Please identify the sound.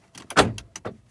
Car Door Porter Unlocking
A mechanical car door lock unlocking.
Recorded with Edirol R-1 & Sennheiser ME66.
mechanic, switch, unlocked, click, button, unlocking, clink, mechanics, car, door, lock, unlocks, cardoor